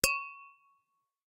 Common tumbler-style drinking glasses being tapped together. Good pitched resonance after hit. Close miked with Rode NT-5s in X-Y configuration. Trimmed, DC removed, and normalized to -6 dB.
glass, resonant, tap, tumbler